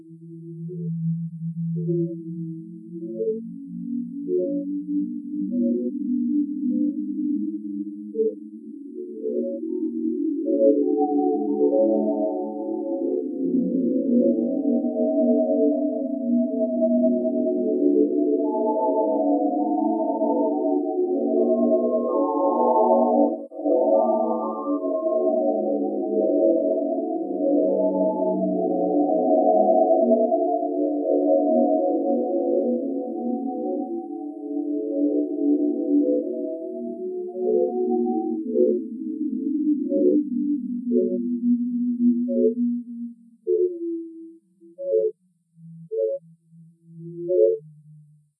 A simple graphic created in a graphics program. Sound generated and edited in coagula program. Post-processed in audacity